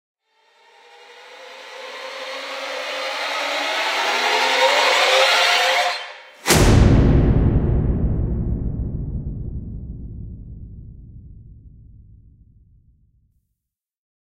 ⇢ GREAT Fx Strings Riser Impact
Strings Riser Impact. Processed in Lmms by applying effects.
impact, orchestral, orchestral-riser, riser, riser-orchestral, strings-impact, strings-riser